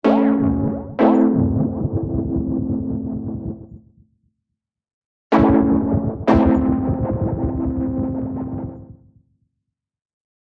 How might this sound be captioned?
LFO'ing with a knob sine waves.....